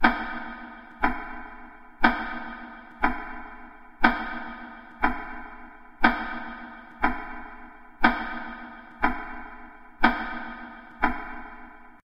Terror clock
tick-tock, tic-tac, room, clockwork